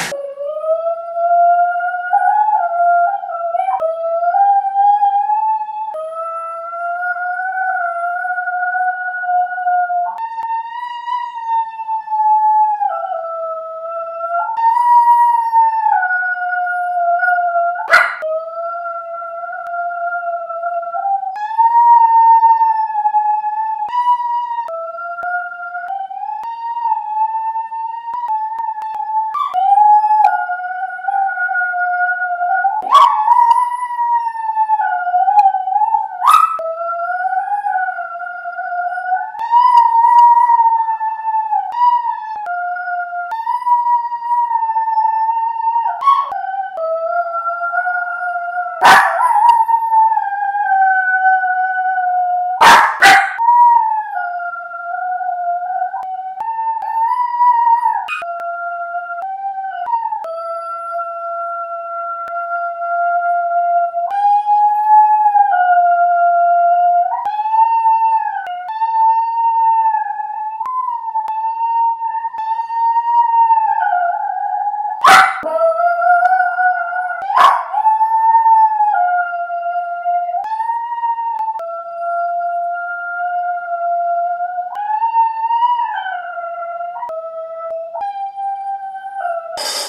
We had only one dog at the time of this recording, she had a type of separation anxiety. This was done in order to be aware of the noise pollution we expose our neighbors to :)